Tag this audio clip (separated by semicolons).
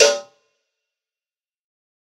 cowbell; dirty; drum; drumkit; pack; realistic; tonys